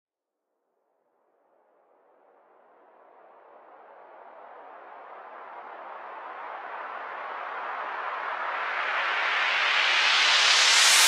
This is a so called "whoosh-effect" which is often used in electronic music. Originally it´s a 6-bars sample at 130
It´s a sample from my sample pack "whoosh sfx", most of these samples are made with synthesizers, others are sounds i recorded.
swoosh, sfx, swosh, woosh, swash, electronic, whoosh, fx, music, synthesizer, swish